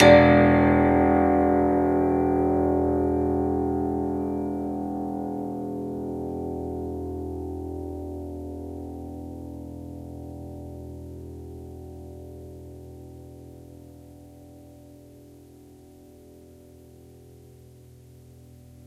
samples in this pack are "percussion"-hits i recorded in a free session, recorded with the built-in mic of the powerbook
piano, string, unprocessed, hit